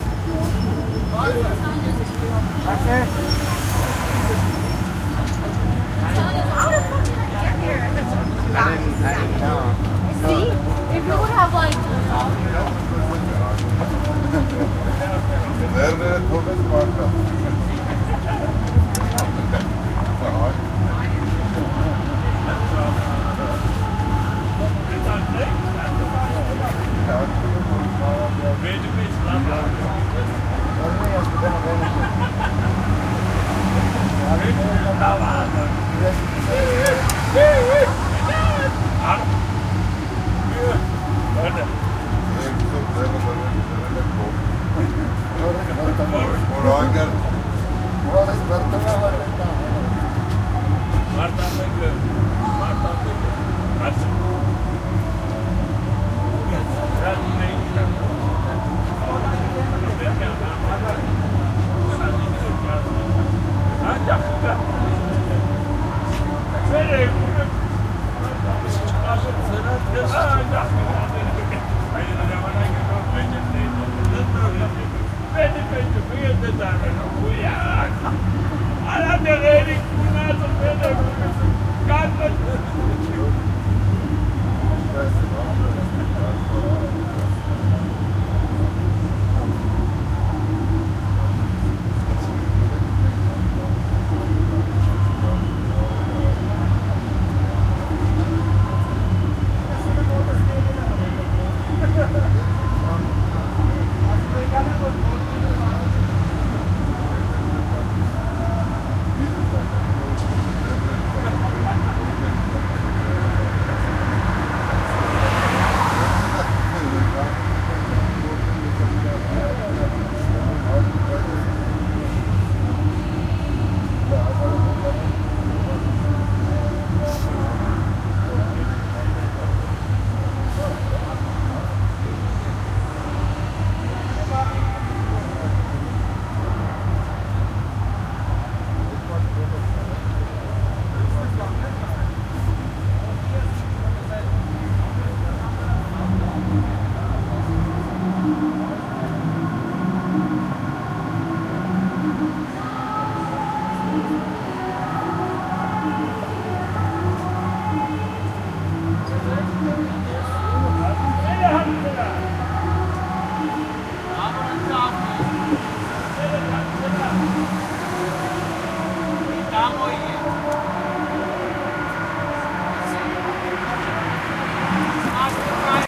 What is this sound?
This is the Taxi Stand outside the Avalon Dance Club in Hollywood, CA. One can hear a mixture of Armenian chattering, traffic driving by, and the reverberant music coming from the inside of the club.